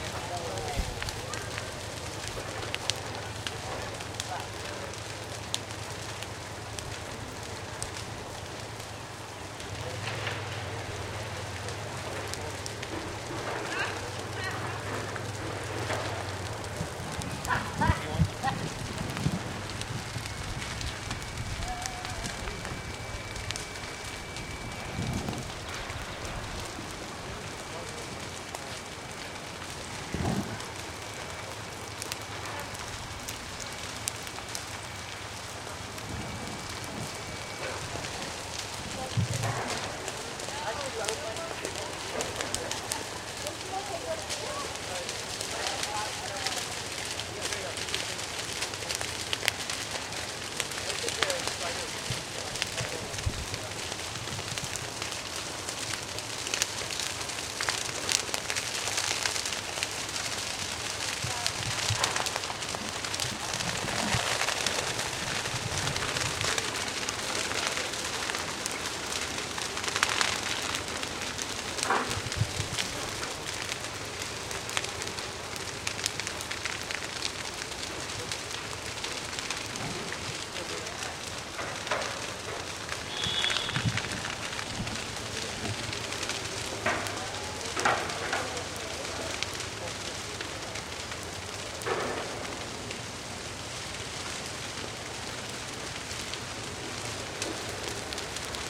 Bloor burn High Park Toronto 21 Mar 2012
A field recording made during the 2012 Prescribed Burn in Toronto's High Park, 21 Mar 2012. This is an annual event, and is used to maintain the parks' continentally-rare black oak savannah ecosystem. This recording made during the Bloor St phase of the burn.
Roland RO5 sound recorder with Sennheiser MKE 400 stereo microphone.
Canada, field-recording, high-park, prescribed-burn, Toronto